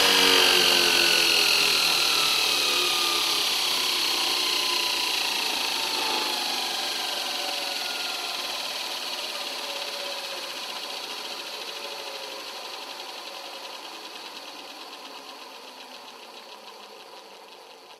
Belt grinder - Arboga - Off
Arboga belt grinder turned off.